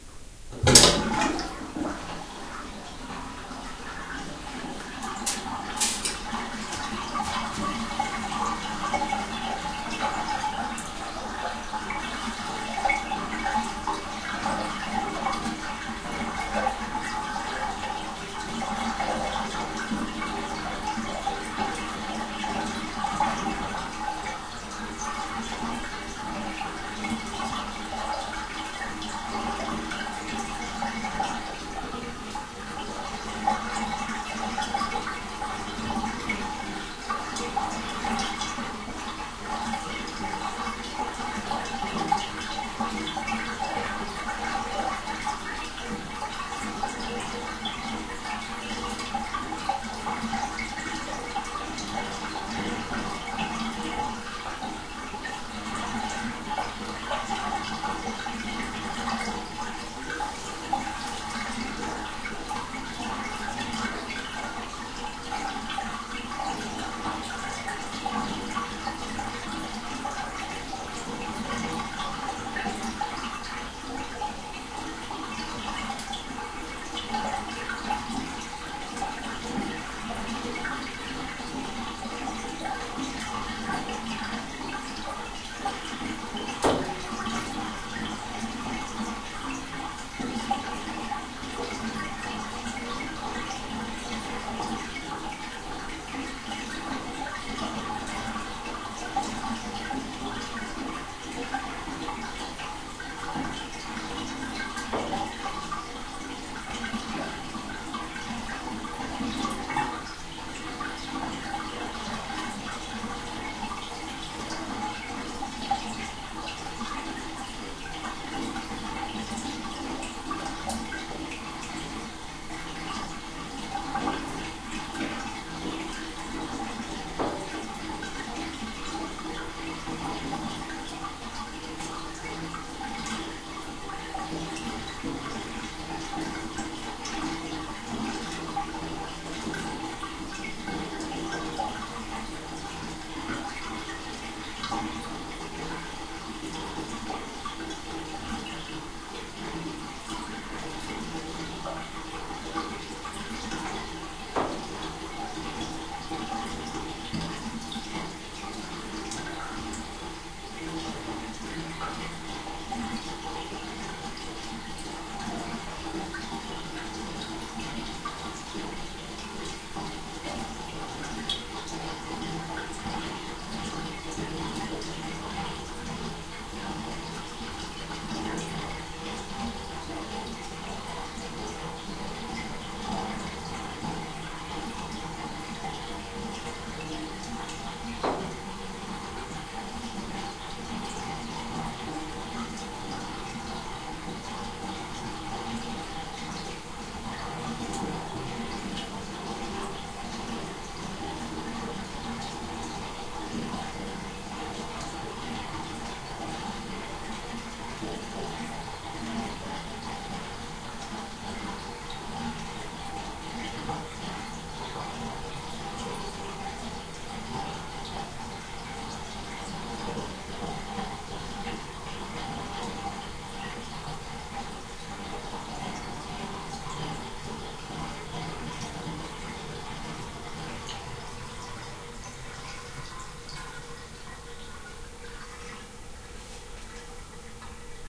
draining the tub
The sound of a bathtub being drained. Amplification and compression used.